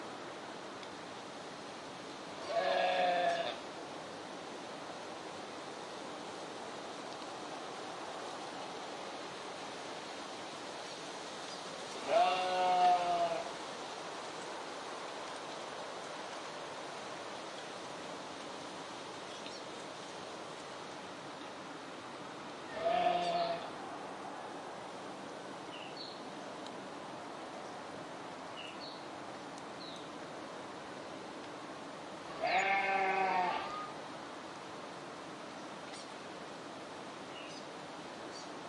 ireland amb
Sheep and birds... Ireland
audio, sfx